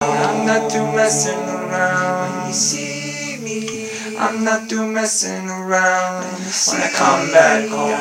TREEQ34445 Vocals
A collection of samples/loops intended for personal and commercial music production. For use
All compositions where written and performed by
Chris S. Bacon on Home Sick Recordings. Take things, shake things, make things.
samples, drum-beat, looping, percussion, bass, whistle, synth, voice, free, beat, loops, harmony, loop, indie, acoustic-guitar, piano, original-music, guitar, sounds, Indie-folk, rock, drums, melody, vocal-loops, acapella, Folk